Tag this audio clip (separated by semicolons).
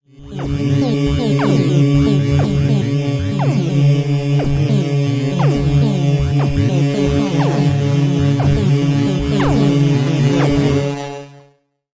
Synthetic; Glitch-Machines; Organic